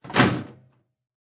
file cabinet metal locker hit or close drawer metal impact
locker, cabinet, or, close, file, drawer, metal, impact, hit